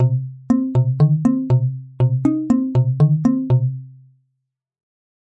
Plucked Synth Loop 1
Simple pluck synth lead loop, simple and chill.
Simplistic, Open, Lead, Trance, Mellow, Synthesizer, Simple, Minimal, Synth, Synth-Loop, Chill, Relaxed, Lounge, Loop, House, Pluck, Man, Stereo, Plucky, Wide